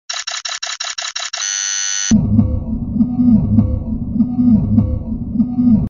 beat with kaoos
kaoos, mix, sample